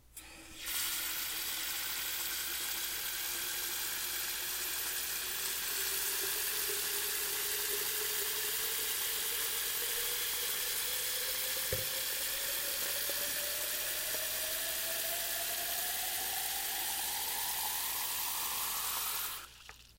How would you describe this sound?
Water Faucet Fill Up Vase
Filling a vase up with water from a bathtub faucet.
filling, cup, fill-up, sink, liquid, fill, pour, up, vase